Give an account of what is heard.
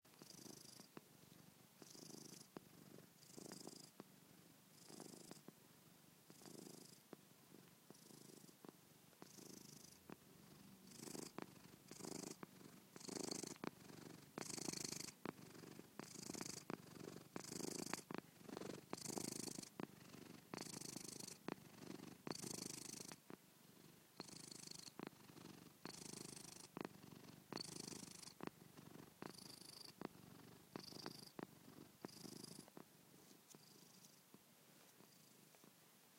A purring cat